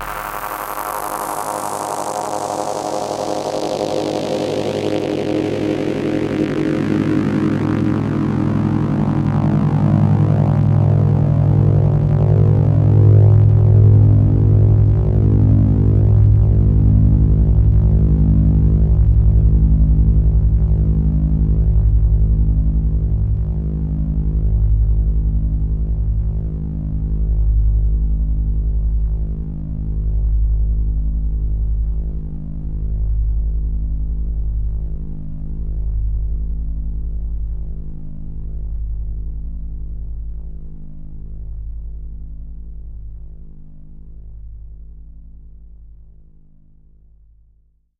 Q Saw filter sweep - G#0
This is a saw wave sound from my Q Rack hardware synth with a long filter sweep imposed on it. The sound is on the key in the name of the file. It is part of the "Q multi 003: saw filter sweep" sample pack.